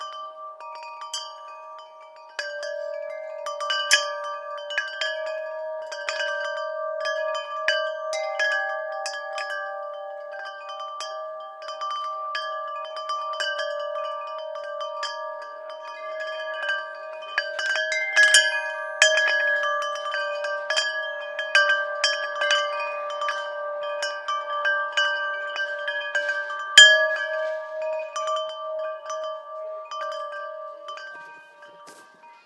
Dull metal windchimes